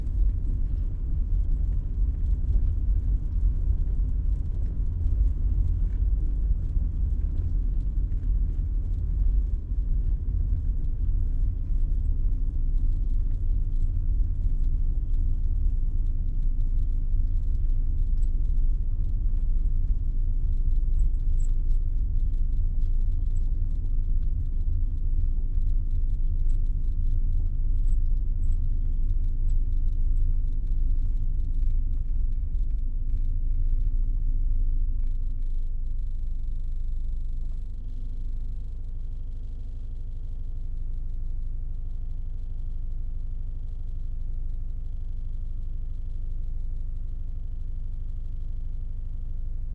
Slow moving car #2
1992 Toyota Camry driving on a country road. I needed this type of sound for an AV with specific time characteristics.
0-10: 30 kph.
10-30: slowing down.
30-35: stopping.
35-50: idling.
Recorded on a Marantz PMD 661, 10 December 2020 at 21:20, with a Rode NT4.
car, country-road